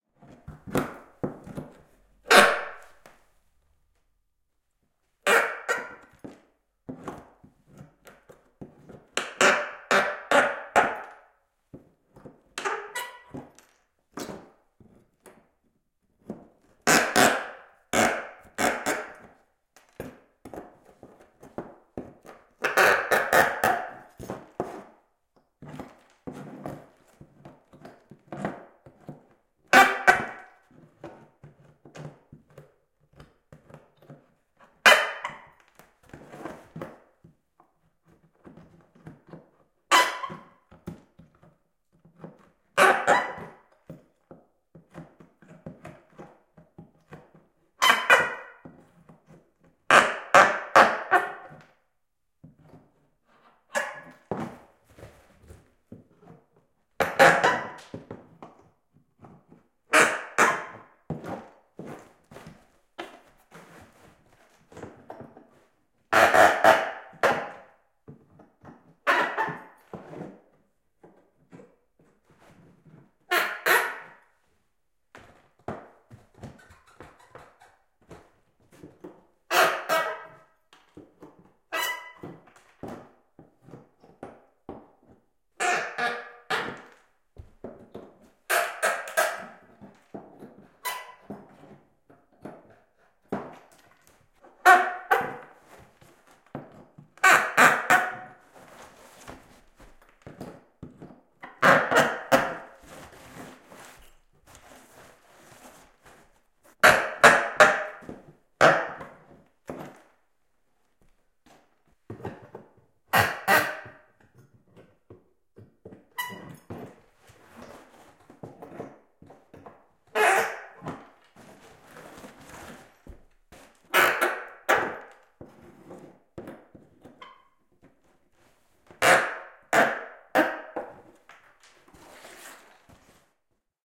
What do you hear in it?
Nauloja irrotetaan laudasta / Rusty nails, removing nails from boards in a room, creaking and squeaking, interior
Ruosteisia nauloja irrotetaan laudoista huonetilassa, narinaa, narahtelua ja kirskumista. Sisä.
Paikka/Place: Suomi / Finland / Vihti, Ojakkala
Aika/Date: 18.03.1997
Board, Tehosteet, Creak, Finnish-Broadcasting-Company, Narista, Irrottaa, Irti, Yle, Squeak, Lauta, Yleisradio, Wood, Interior, Naula, Soundfx, Nail, Puu, Suomi, Irrotus, Vinkua